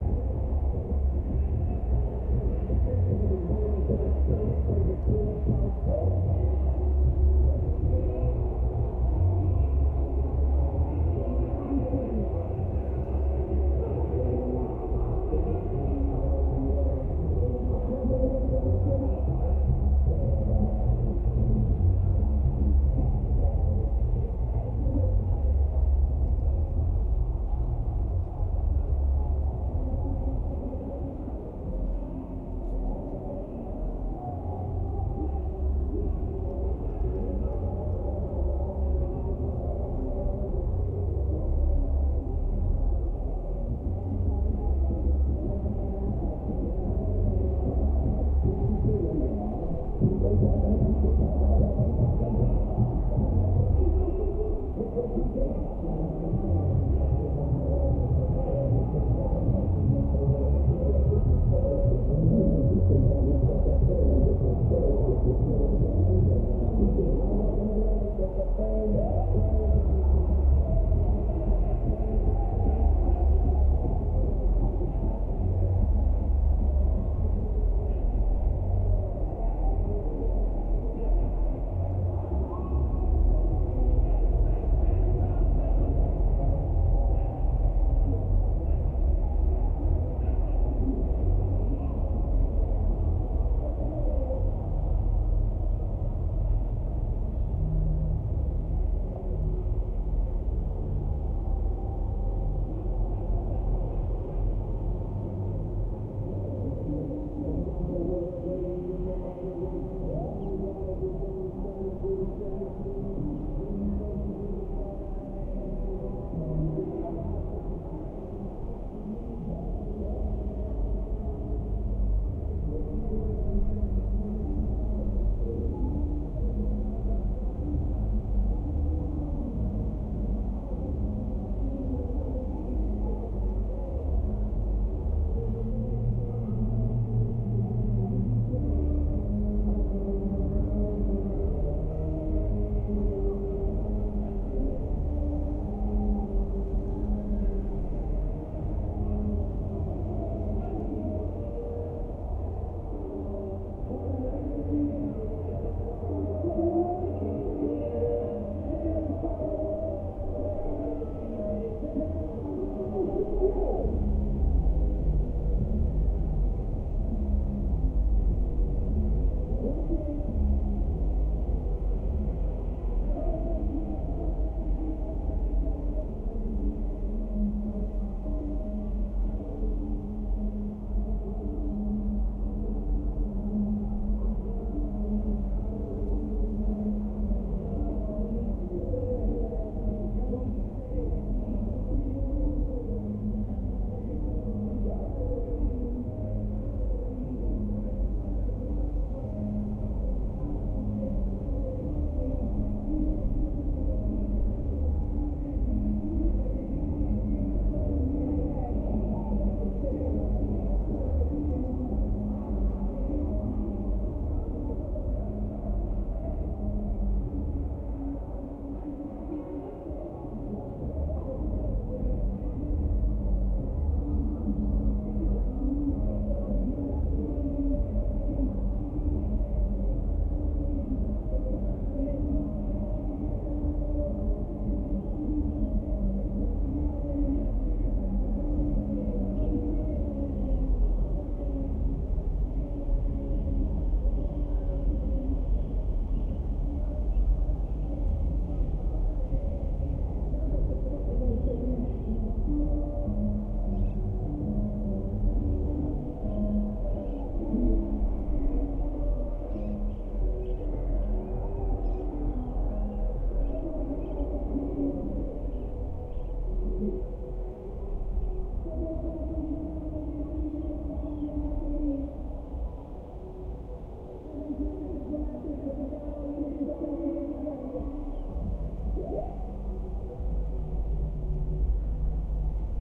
distant music festival
a very distant recording, about 350 meter from the source, of a music festival in Amsterdam. The festival, Appelsap, was held on August 10th 2013 in the Oosterpark (municipal park) with 10,000 visitors in attendance. recording made from the roof of a 4 floor building.
EM172-> Battery Box-> TC SK48.